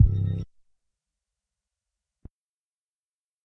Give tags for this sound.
bass
drum

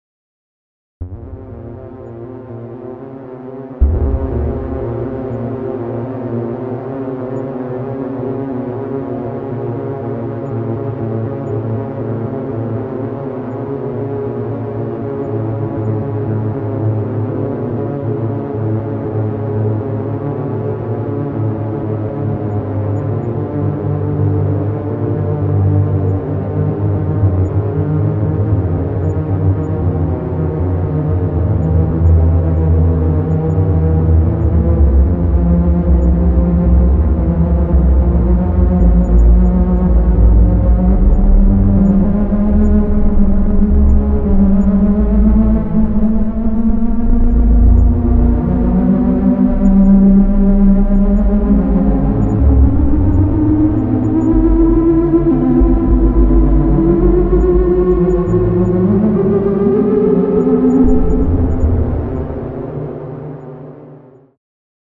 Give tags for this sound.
discordant
drone
growing
rising
synth
tension
wet

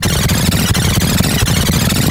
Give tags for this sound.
engine
futuristic
game
gamedev
gamedeveloping
games
gaming
high-tech
indiedev
indiegamedev
loop
sci-fi
science-fiction
sfx
spaceship
video-game
videogames

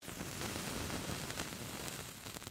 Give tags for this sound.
burn
burning
Fire
Flame
frying
Fuse
Ignite
sizzle
sizzling

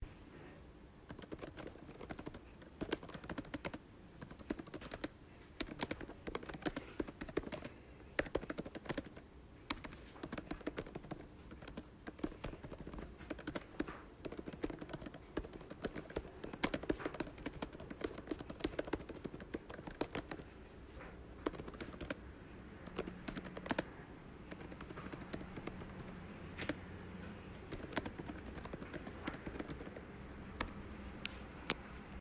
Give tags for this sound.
typing
keystroke
typewriter
key
keyboard
type
click
mechanical
press
computer

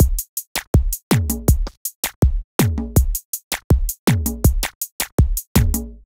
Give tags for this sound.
drum
beat
loop
zouk

